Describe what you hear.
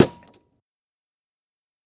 I hope they are helpful for you! There are many snares, a few kicks, and a transitional sound!